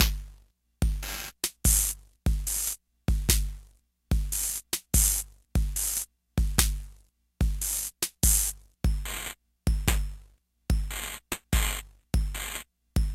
A 4 bar loop at 72 BPM. Created with an old Boss drum machine processed through a Nord Modular.
loop, drum, percussion, beat, digital, glitch